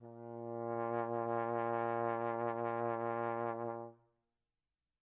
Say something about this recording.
One-shot from Versilian Studios Chamber Orchestra 2: Community Edition sampling project.
Instrument family: Brass
Instrument: Tenor Trombone
Articulation: vibrato sustain
Note: A#2
Midi note: 46
Midi velocity (center): 63
Room type: Large Auditorium
Microphone: 2x Rode NT1-A spaced pair, mixed close mics